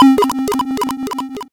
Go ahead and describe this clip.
Glitch 2 - Bouncing squares
A glitch sound effect generated with BFXR.
bfxr, digital, glitch, lo-fi, noise